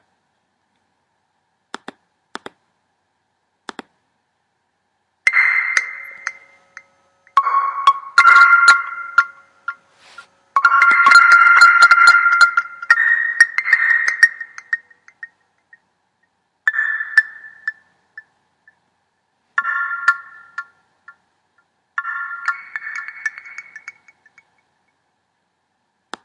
It sounds as dripping water, I played piano and changed to bass sound after.